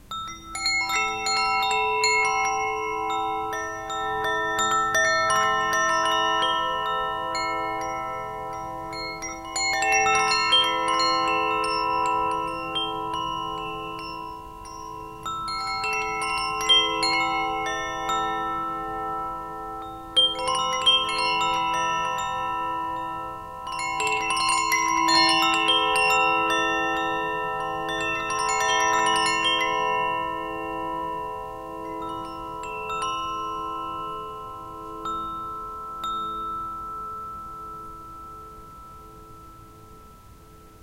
Chime bell recorded with Zoom H1n.
metal, chimes, bell, wind